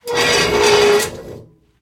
Steel chair frame - scrape on concrete.
Chair, Scratch, Legs, Slide, Push, Sliding, Metal, Friction, Steel, Scrape, Drag, Scraping